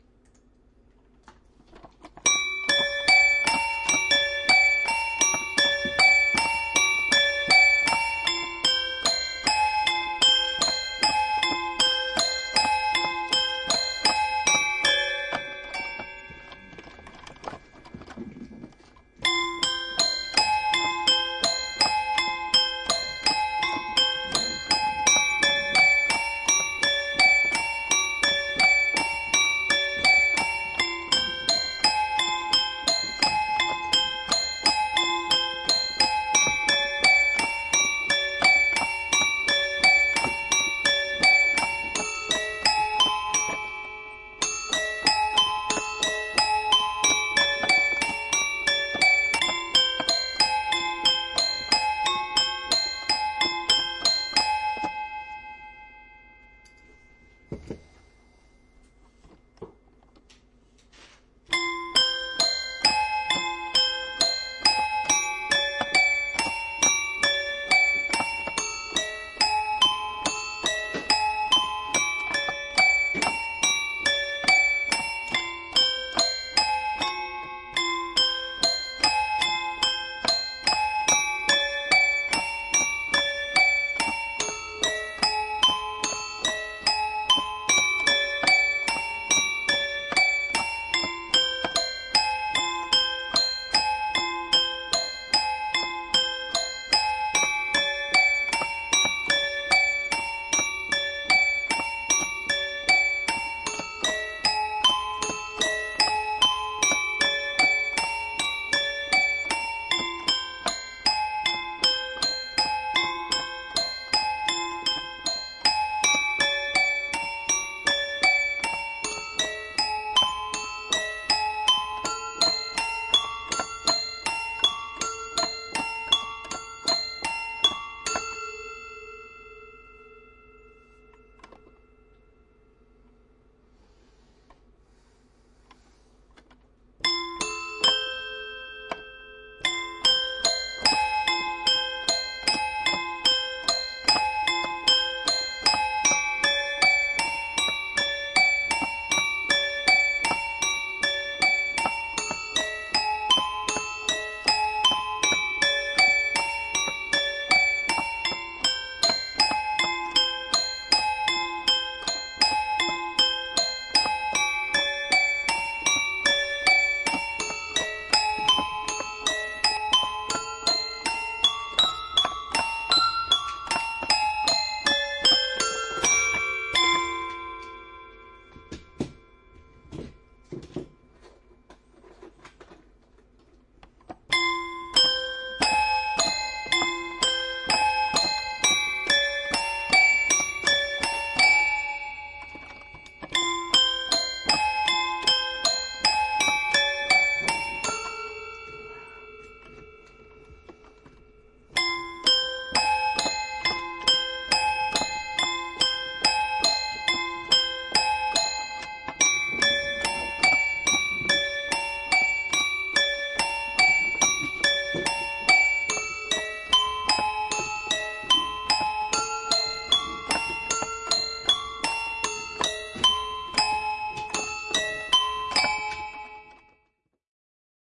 TOY PIANO PLAY IMPROV 02 08-09-18
I decided to tinker around on an old kid's practice First Act Piano. There are metal rods inside that are struck, and produce a plink/ringing sound. It is also out of tune, which makes it wonderful for producing an eerie feeling when played in a minor key.